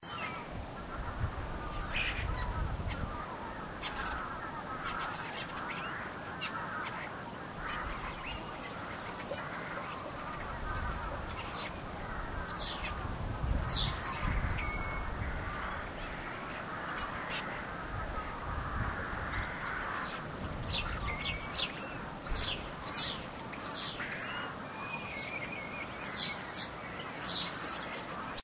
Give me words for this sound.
Birdsong recorded in my garden, summer 2005, in Orkney with geese in the distance. Sony MD, mic ECM-MS907.
field-recording, birdsong, orkney, garden, ambient